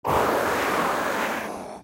cell screams 13
Short processed samples of screams
horror, breath, processed, monsters, scream, vocal, effect, monster, fx, screams, human, air